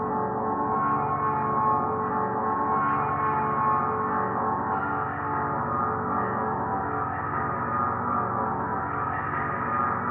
Playing some notes on my bass guitar through a strange effect chain including some reverse reverb and distortion. The sound should loop in a strange and glitchy manner.
musical, bass, ambient, reverb, abstract, glitch, reverse, guitar